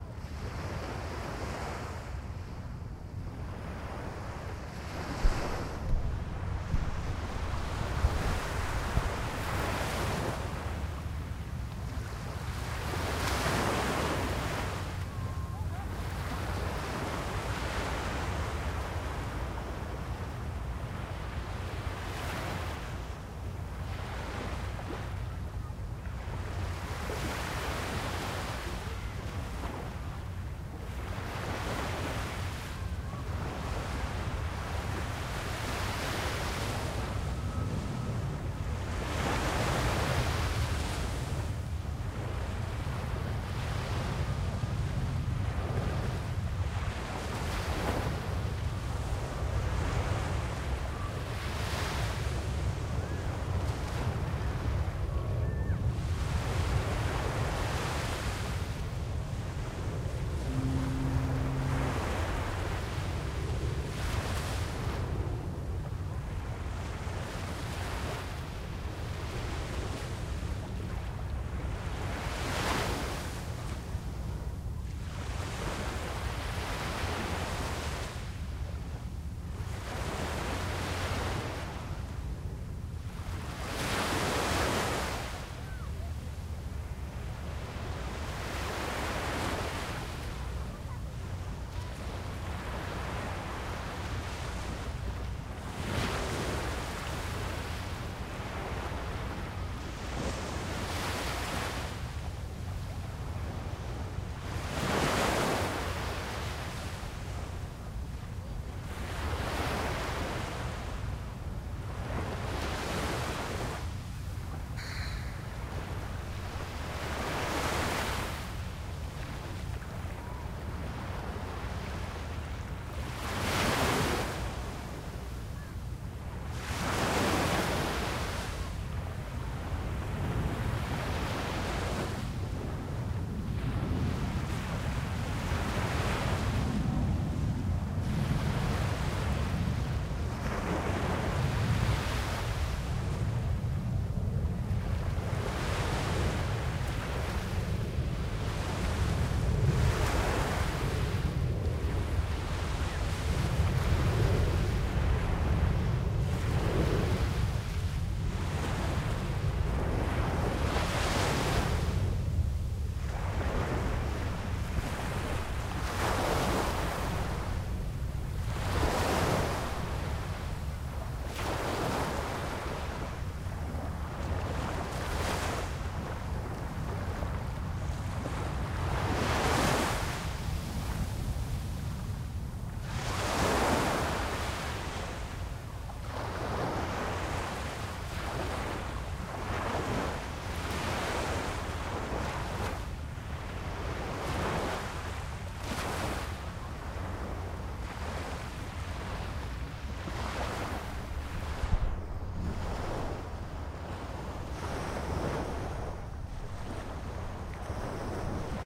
Waves Against Shore
The sound of waves lapping against the shore in Singapore. Recorded with a Zoom H6 and a Shotgun Mic.
beach, field-recording, ocean, shore, singapore